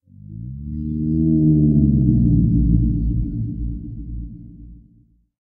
rnd moan13
Organic moan sound